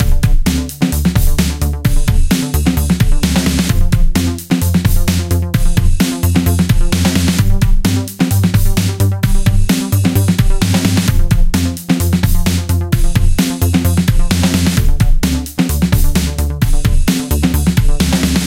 duskwalkin loop
A BGM made for my game 'Don't want to be an Eyeraper'